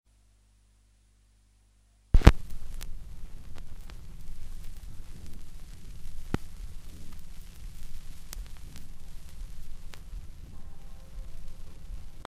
Record needle (stylus) lands on the record (LP). A few seconds of rumbles and clicks at the start of a record. Mono.